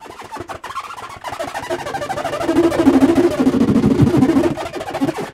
bln rub lng 03
Rubbing a balloon with the hands. This is a cropping of a sounds from pitx's "Globo" balloon samples. Normalized in ReZound. The original description: "It's the sound of a balloon flonded with the hand. Recorded with Shure 16A plugged in the PC. Sonido de un globo cuando se le pasa la mano por encima. Grabado con un micrófono Shure 16A enchufado en el PC."